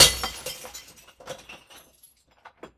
Glass Shatter 1
Throwing away glass trash.
bin, bottle, break, crack, crunch, drop, glass, recycling, shards, shatter, smash